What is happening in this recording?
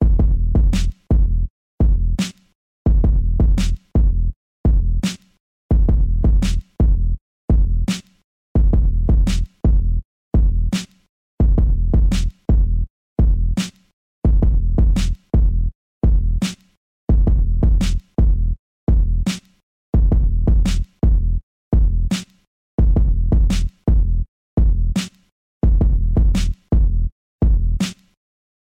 Beefy Kick n Snare 84 bpm
84bpm, beat, beefy, drumloop, hard, kick, loop, sample, snare